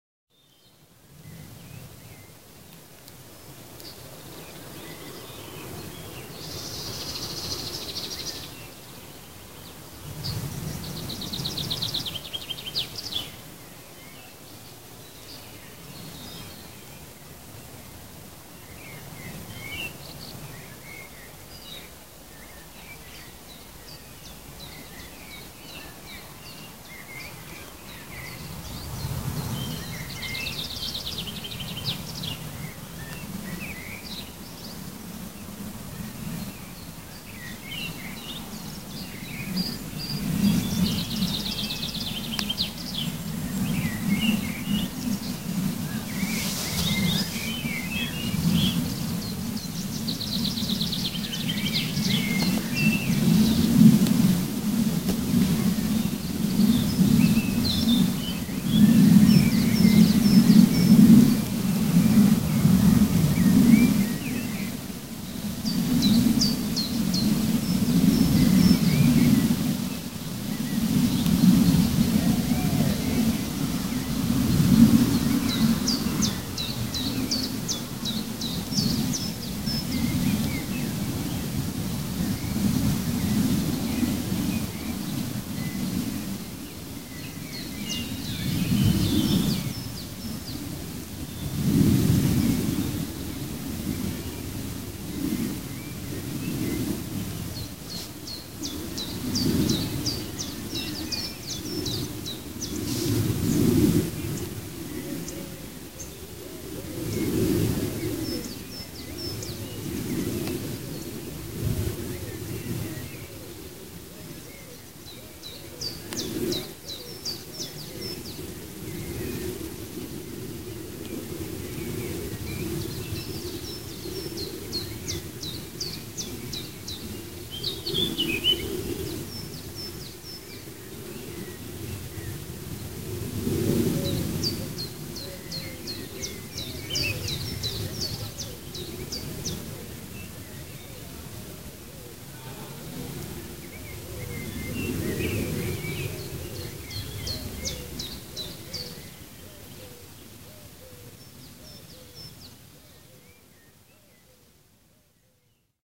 Recorded with cell.
Early summer, czech wood outside the camp, early evening ambiance